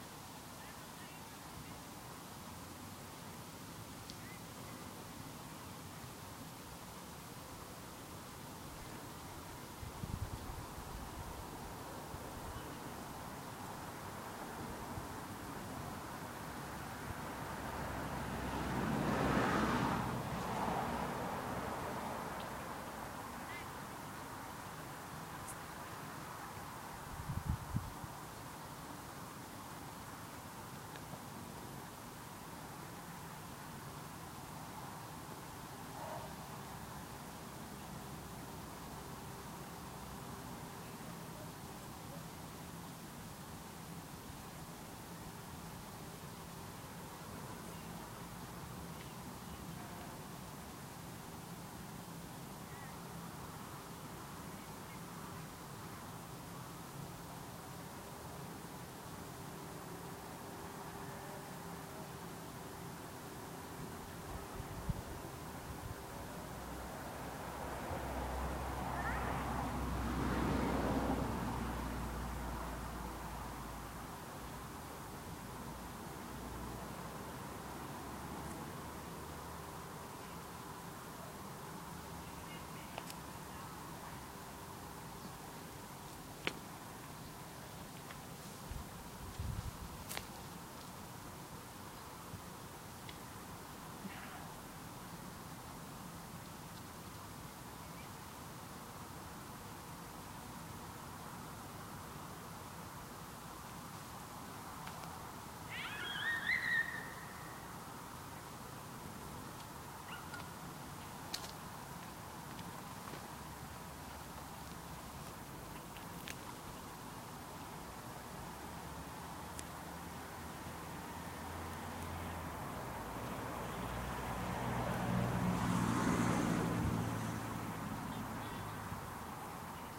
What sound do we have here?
Ambience in a small urban park. Some traffic in the background. Saturday evening, I forget when I recorded this.
ambience, background-sound, park, cars, urban, general-noise, atmosphere, evening, ambient, car, traffic, field-recording, ambiance, quiet